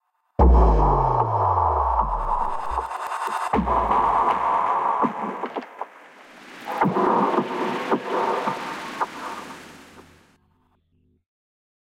Depth Charges Kaboom SFX
Recording of me flipping an electric switch in the bathroom, when I pitched down & went on to process it with weirdo effects, sounded off an explosion like that of a depth charge.
ableton, charge, deep, depth, dive, explosion, foley, impact, processed, recording, soundeffect, soundscape, switch, underwater